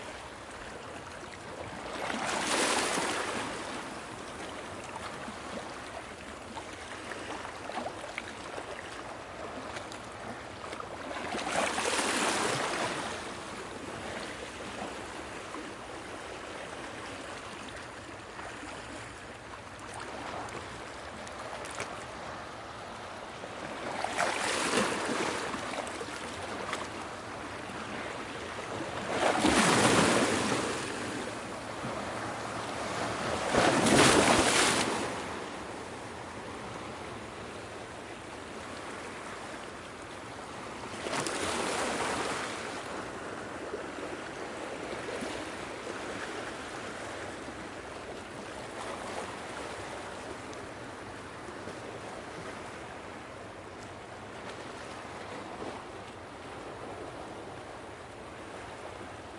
waves ocean or lake lapping splashing deep sloshy tone on rocks Ganpati shrine on water India
waves, Ganpati, or, sloshy, lapping, ocean, rocks, water, India, splashing, lake